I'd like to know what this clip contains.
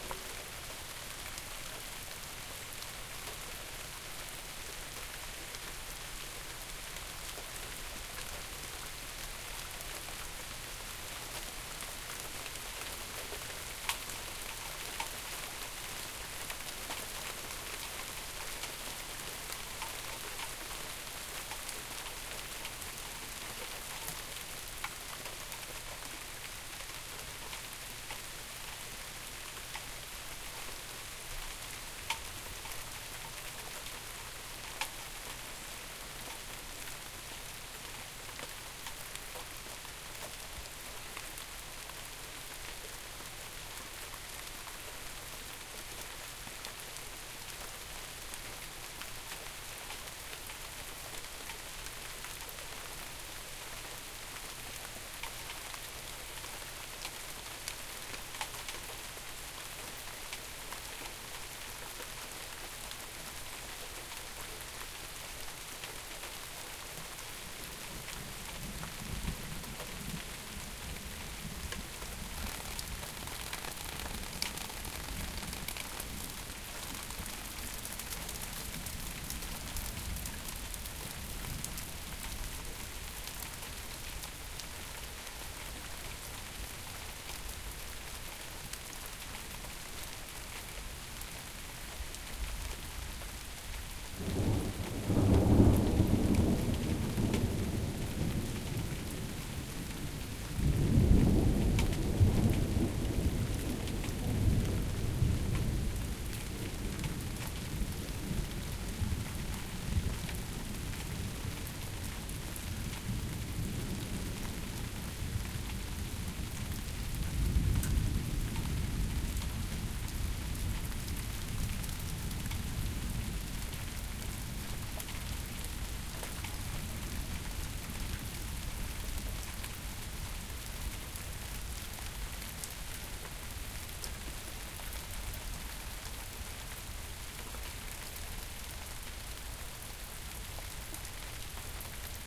rain and thunder
Raw stereo recording of rain and some thunder.